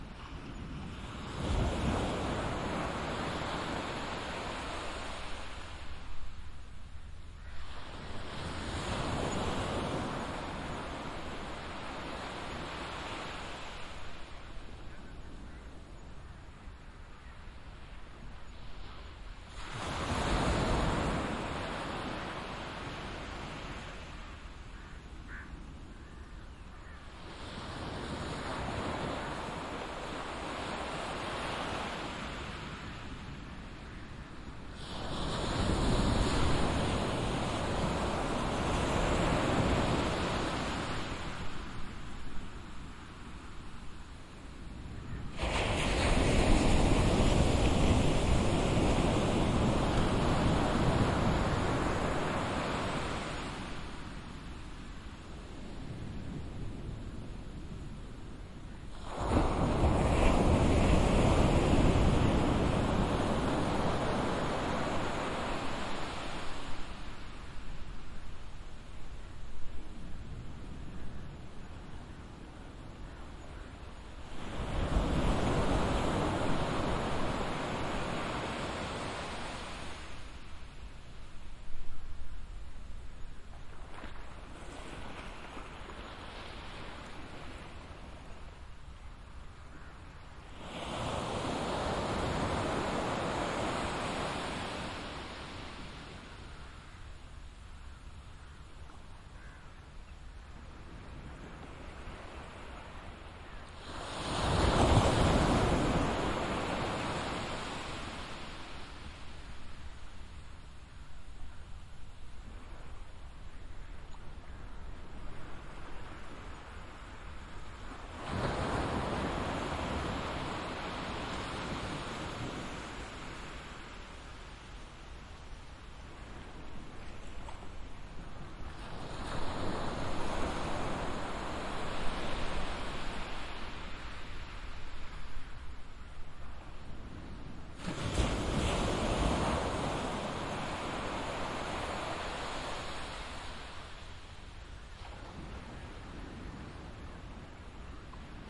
At the Ohm beach in Gokarna, India
coast, seaside, binaural, ocean, water, field-recording, beach, shore, sea, waves, wave